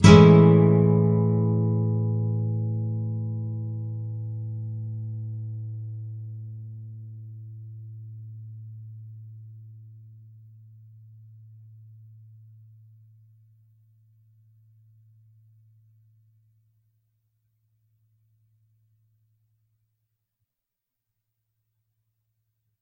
A7th up
Standard open A Major 7th chord. The same as A Major except the G (3rd) string which has the 1st fret held. Up strum. If any of these samples have any errors or faults, please tell me.
7th, acoustic, chords, clean, guitar, nylon-guitar, open-chords